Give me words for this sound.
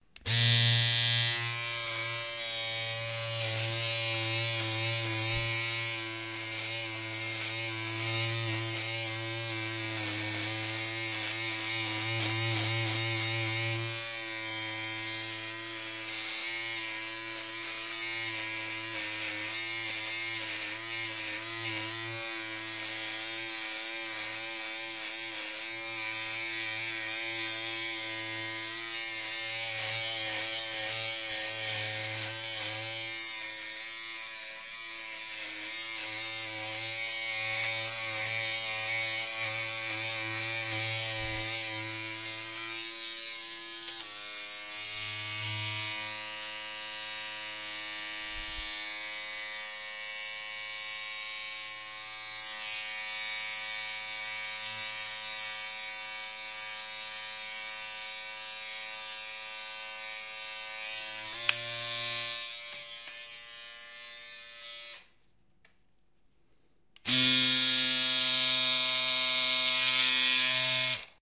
Electric razor <CsG>
Recorded using Zoom H2n. The rare occasion I am shaving my face.